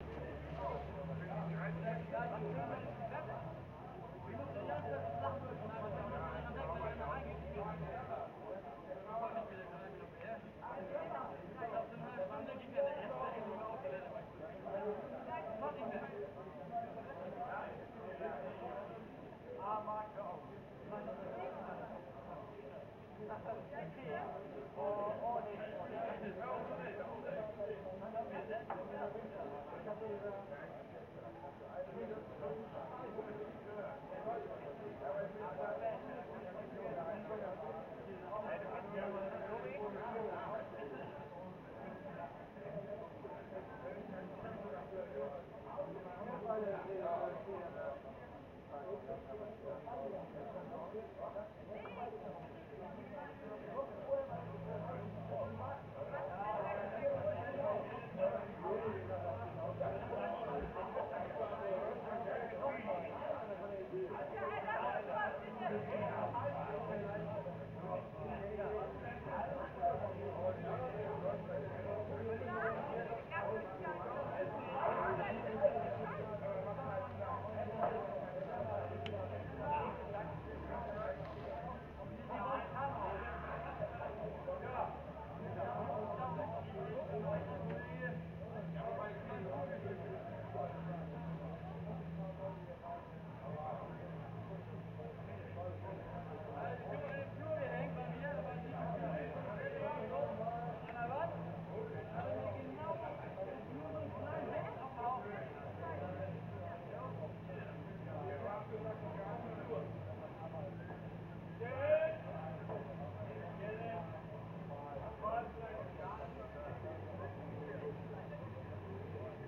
People talking loud in the distance at late night - Outside Ambience
I recorded this at late night in a rural german area at summer, approximately 150 - 200 meters away from the Party (The party took place on a big and open field). The wind was blowing away from the people towards me, which is the reason, why you can hear the people well, even though there were trees between the people and the recording micorphone.
Mabe you can hear a few cars very far in the distance along with the near taxis, picking the people up to take them home, too.
ambience, ambient, atmosphere, away, celebrating, celebration, distance, far, field-recording, hot, humans, landscape, late, laughing, loud, night, party, people, screaming, sound, summer, talking, very, yelling